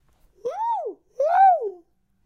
Bird - Artificial 1
This is an artificial bird sound, made with a human voice.